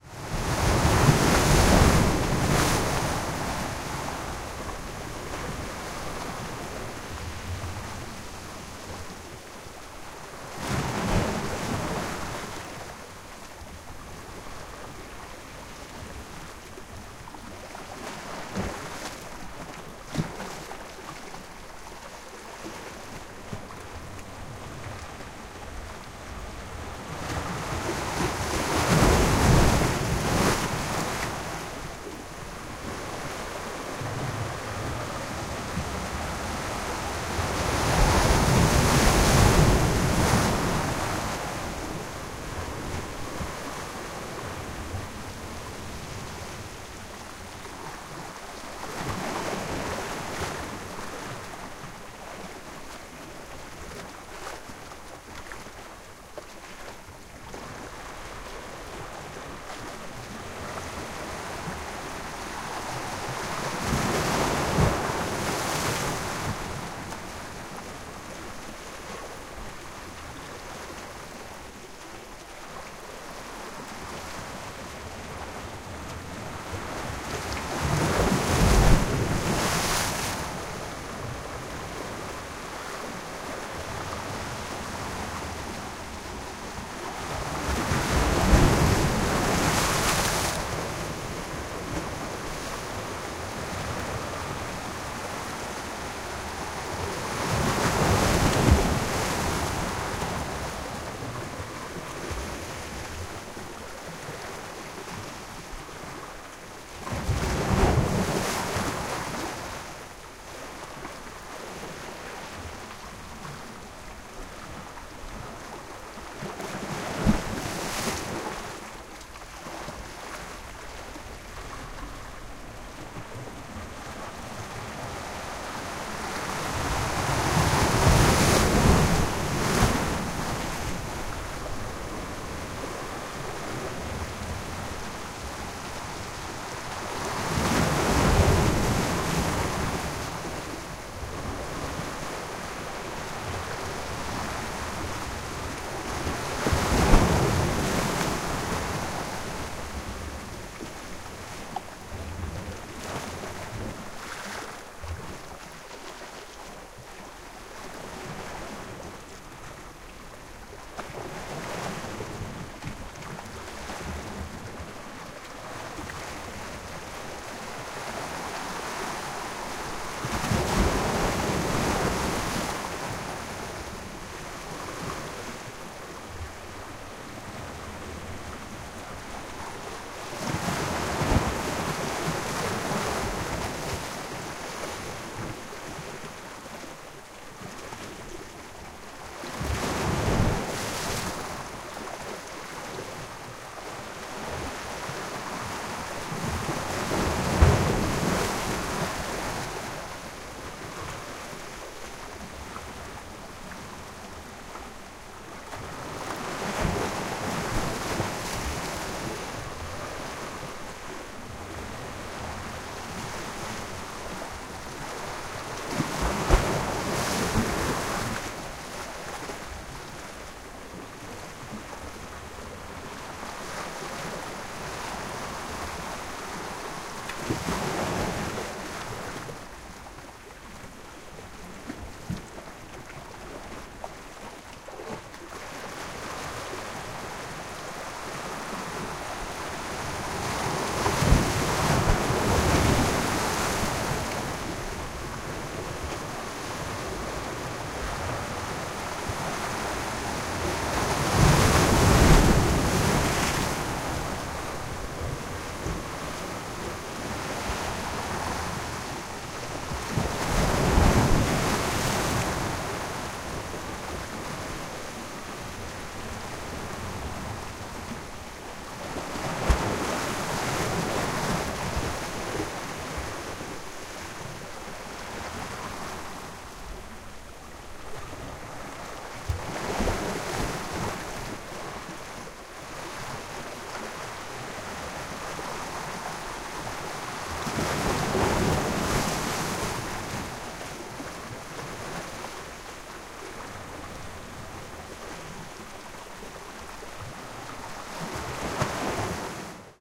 Kiholo Bay Rocky Break
Stereo ambient field recording of the rocky shore break at Kiholo Bay on the Big Island of Hawaii, made using an SASS
Break, Rocky, Stereo, Beach, Sea, Tropical, Water, Ocean, Waves, Field-Recording, Rocks, SASS